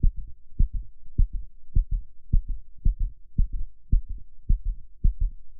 Heartbeat Fast
This is a imitative heartbeat recording. I recorded using a contact microphone (Korg CM-200) connected to my Focusrite 2i2 interface. I attached the microphone to the tip on my middle finger, then with my middle and index finger tapped gently where my finger meets my palm.
This recording features a Fast Heartbeat rhythm.
I used a Low pass filter to remove most of the treble making the recording sound more accurate to an actual heartbeat. I also removed a low buzz caused by be having to crank the gain high to get my intended sound.
Heart-Beat, Heartbeat, beat, korg, workout